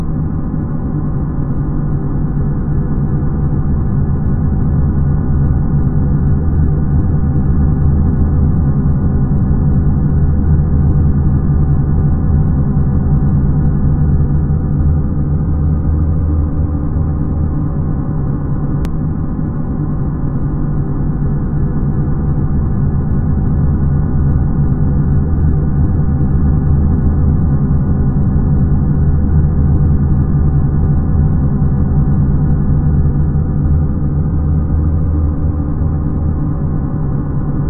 HF Space-Rmx danielc0307 creepy
This barely resembles the original, there's so much reverb and echo.
ambient, dark, drone, mellow